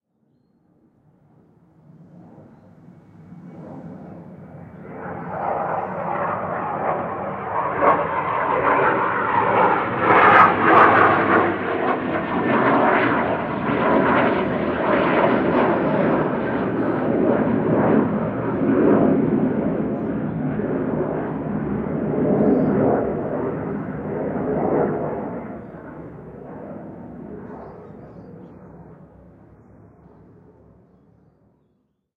F18 Banking Overhead
F18 jet fighter banking overhead. Heard it coming and ran outside waving microphones in the air scaring neighbours :) Recording chain: Rode NT4 (stereo mic) - Sound Devices Mix Pre (mic preamplifier) - Edirol R-09 (field recorder).
aeroplane, aircraft, airplane, f18, jet-engine, jet-fighter, plane, woosh